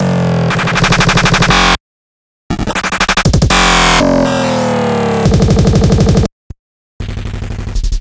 Bend a drumsample of mine!
This is one of my glitch sounds! please tell me what you'll use it for :D
android, art, artificial, bit, command, computer, console, cyborg, databending, droid, drum, error, experiment, failure, game, Glitch, machine, rgb, robot, robotic, space, spaceship, system, virus